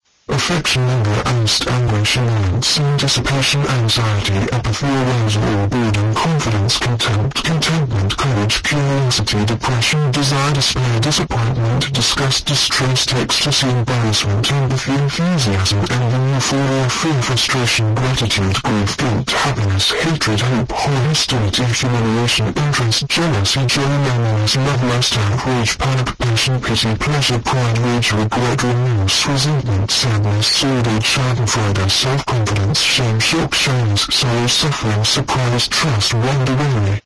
Basically the same as the other emotions track i made, but its a female voice instead. Why i made this, i don't know :)